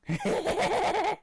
The Laugh
First Time making sound effects need structured feedback please & thank you.
This one is my own voice a different take of the Joker's laugh if you will. Show it to my one friend told him its like a Joker laugh, in response with his heavily accented English "No mang this is scarier than Joker."
evil, Experimental, feedback, laugh